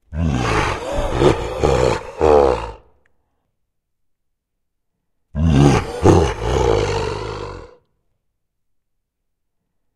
Just laughing in the mic and setting rate to half.
Recorded with Zoom H2. Edited with Audacity.